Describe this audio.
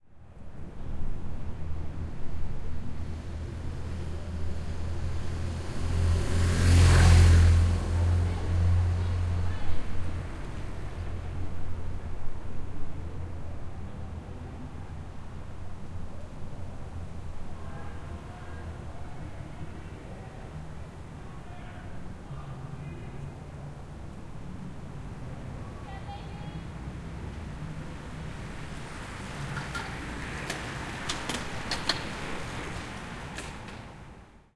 bike race 01
A woman's bike race. First the pacing motorcycle goes by, then the lead racer, then the rest of the racers. There is a small amount of cheering from the crowd.
Recorded with a pair of AT4021 mics into a modified Marantz PMD661.